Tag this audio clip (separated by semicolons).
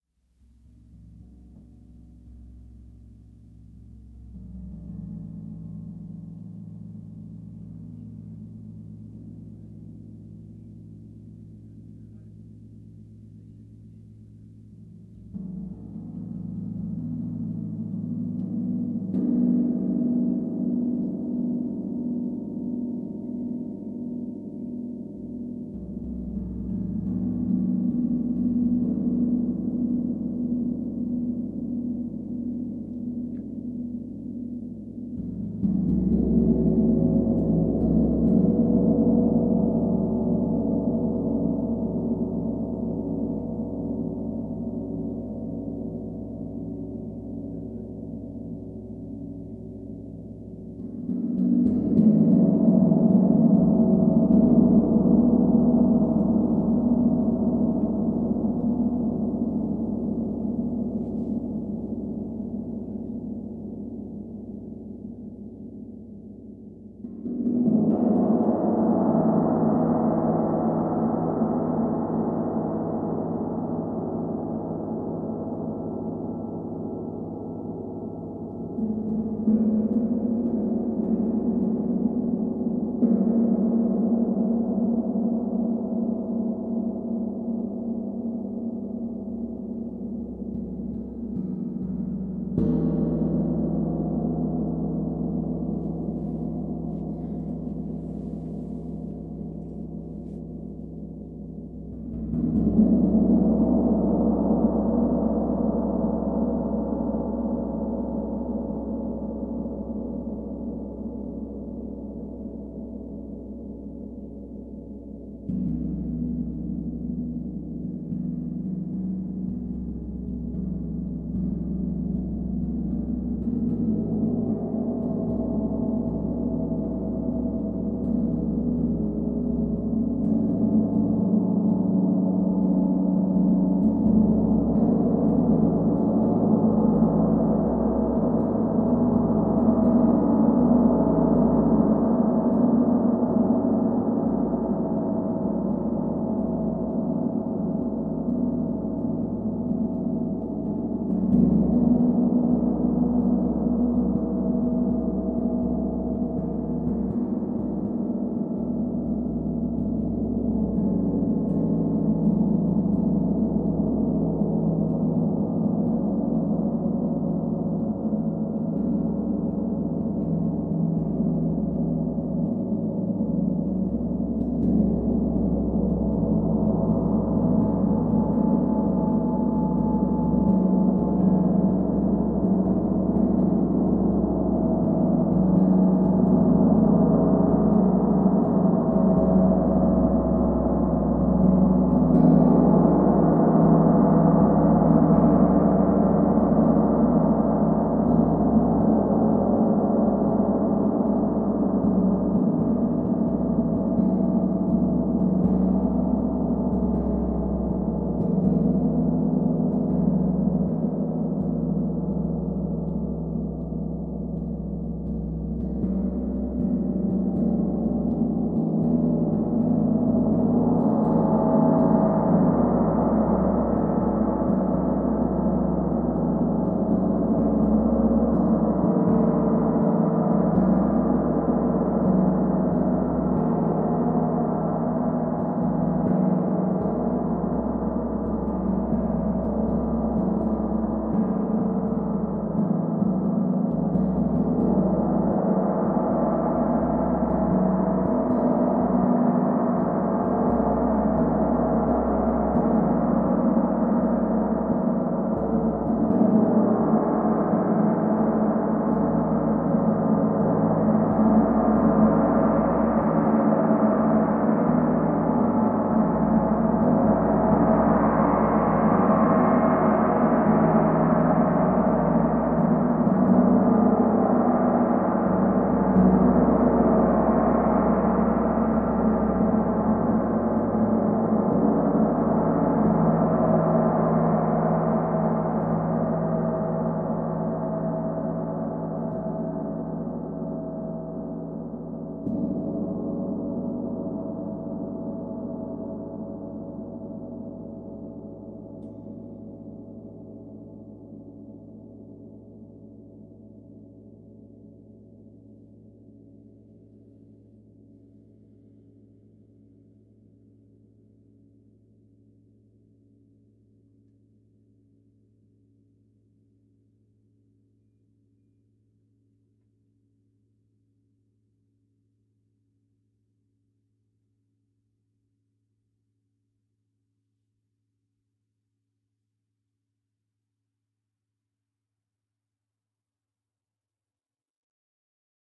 gong; meditation